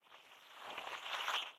The parameters used for the analysis were:
- window: blackman
- window size: 1601
- FFT size: 2048
- magnitude threshold: -90
- minimum duration of sinusoidal tracks: 0.1
- maximum number of harmonics: 20
- minimum fundamental frequency: 100
- maximum fundamental frequency: 2000
- maximum error in f0 detection algorithm: 5
- max frequency deviation in harmonic tracks: 10
stochastic approximation factor: 0.2